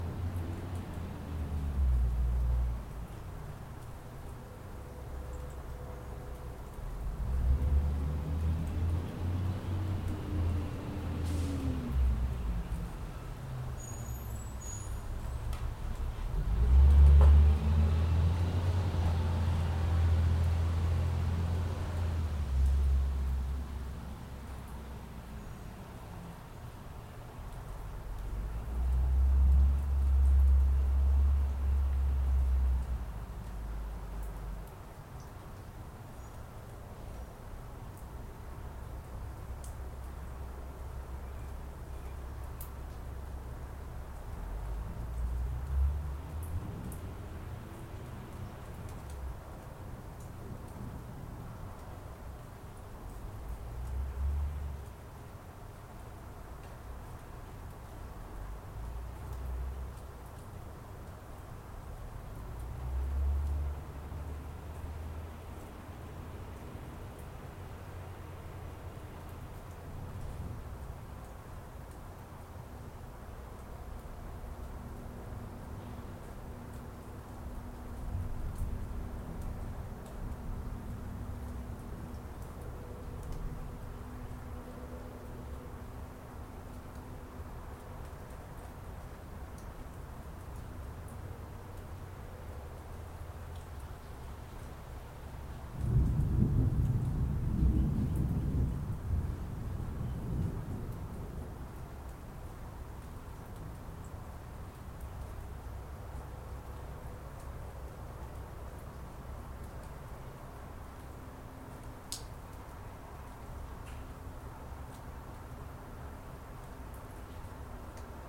Out on the patio recording with a laptop and USB microphone. Garbage truck in the distant delicately collecting our refuse.
truck, outdoor, garbage, field-recording, atmosphere, trash, patio